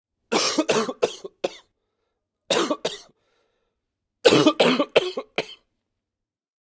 Typical recording of a human coughing
cold, cough, coughing, disease, human, ill, sick, sickness, unhealthy